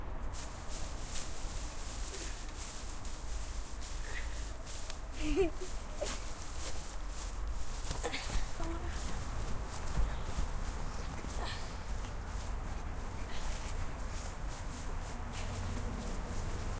Sonic snaps CEVL Grass2
Field recordings from Centro Escolar Vale de Lamaçaes and its surroundings, made by pupils.
Fieldrecording, Lama, Sonicsnaps, aes